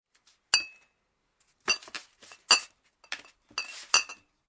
Some bottles moving.